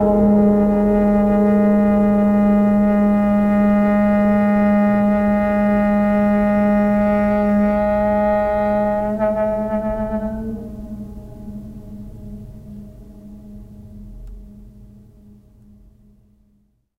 some kind of plane.lawnmower
recordings of a grand piano, undergoing abuse with dry ice on the strings
abuse, screech, piano, ice, dry, torture, scratch